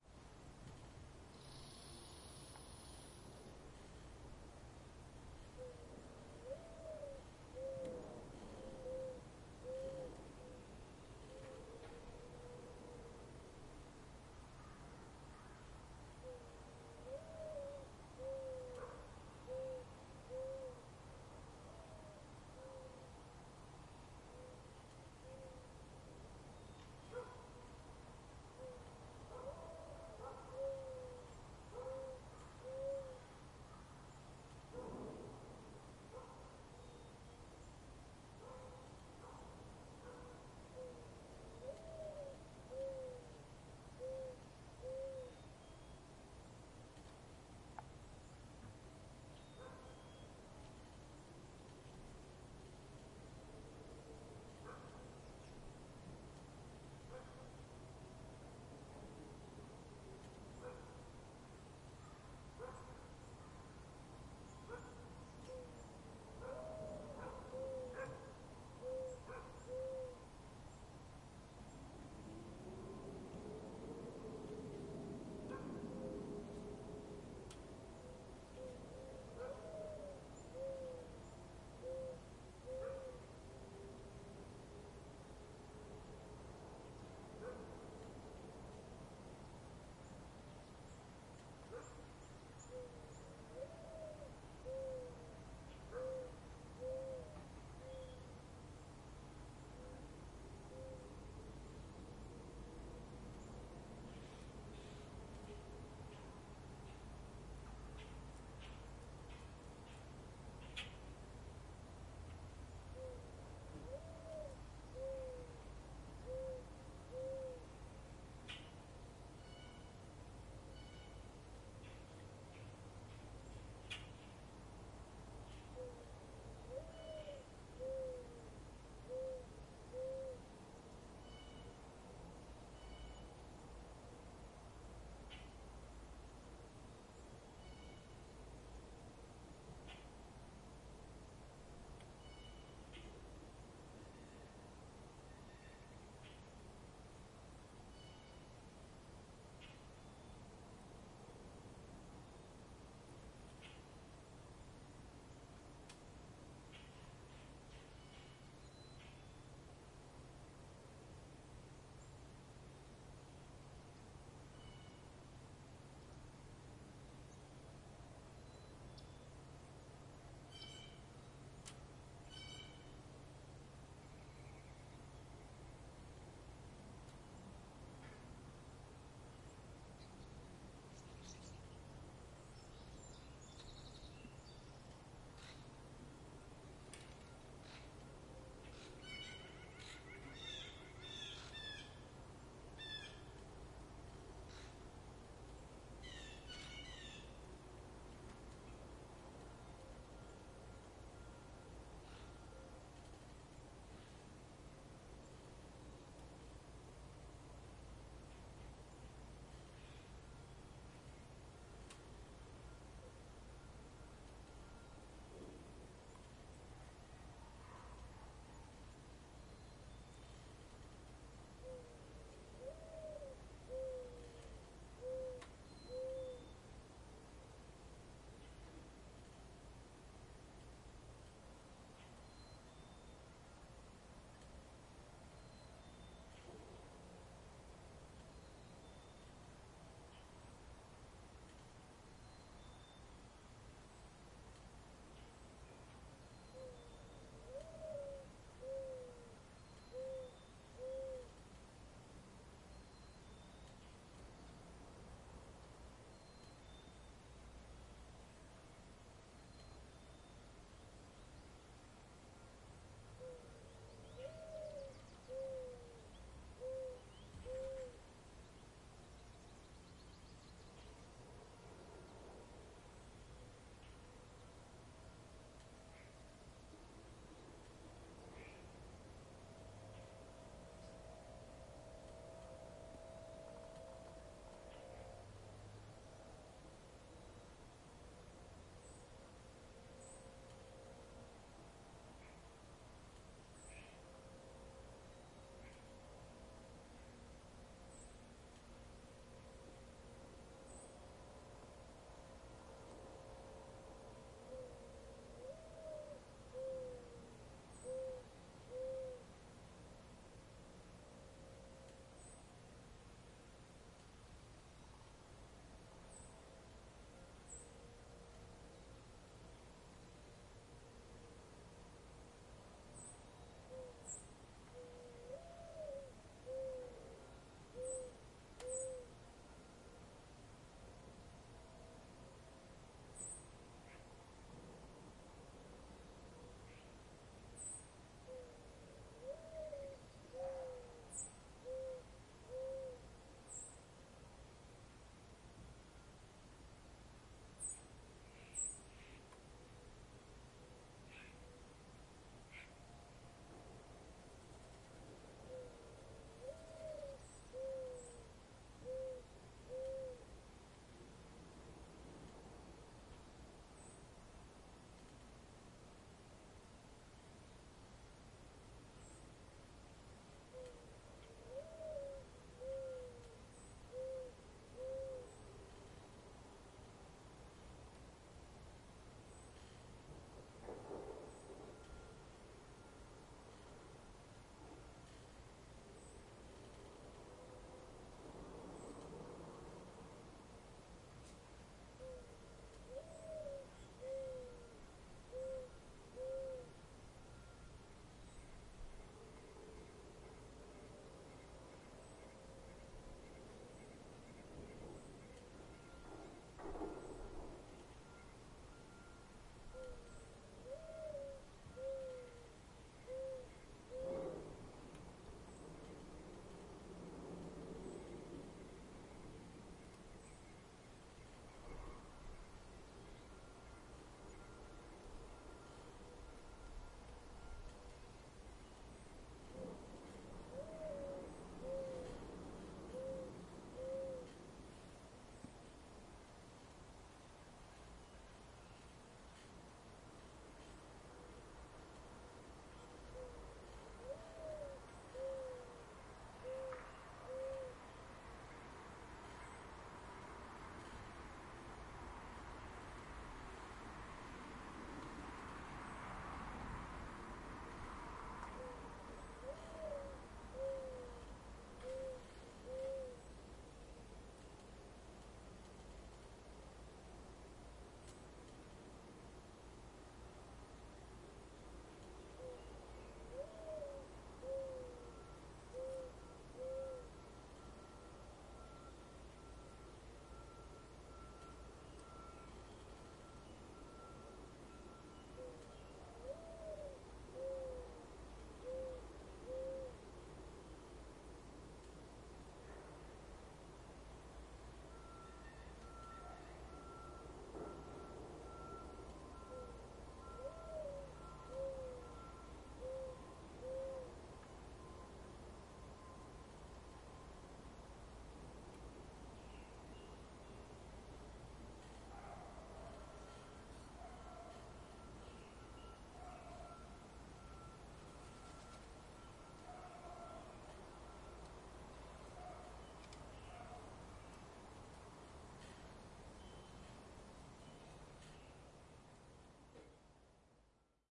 EXT spring light wind mourningdove XY
A light wind, calm morning with a reoccurring American Mourning Dove calling. Some close squirrels and distant traffic. This is a front pair (XY) of a quad recording with an H2.
light, spring, field-recording, canada, dove, wind, morning